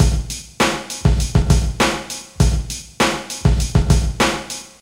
Old School Hip Hop Loop 100 BPM
A little old school hip hop beat I made.